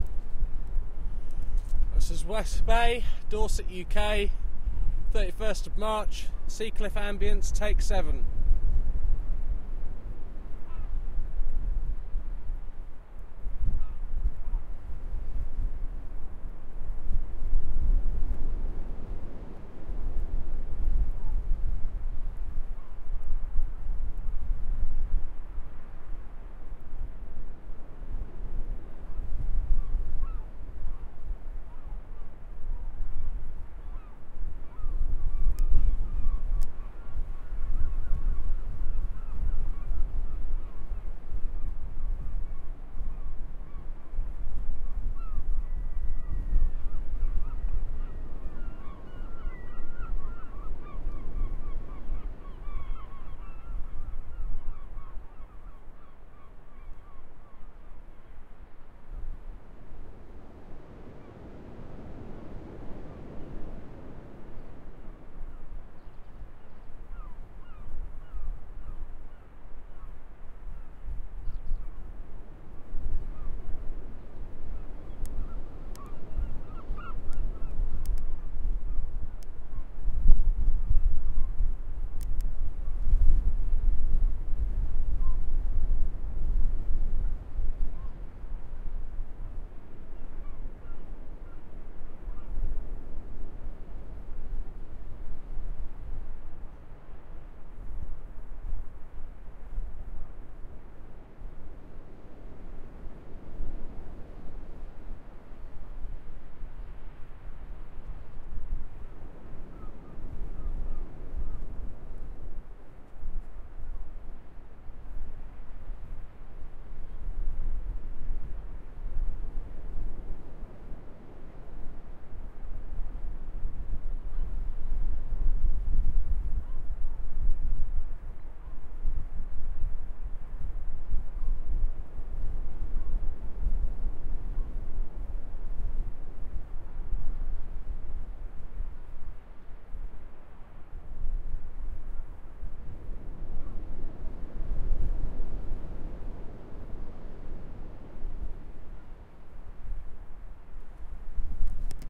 CFX-20130331-UK-DorsetSeaCliff07
Sea Cliff Ambience